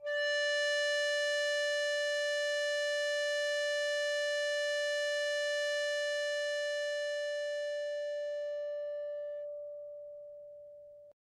EBow Guitar D4 RS
Sample of a PRS Tremonti guitar being played with an Ebow. An Ebow is a magnetic device that causes a steel string to vibrate by creating two magnetic poles on either side of the string.
multisample, d4, drone, ebow-guitar, ambient, melodic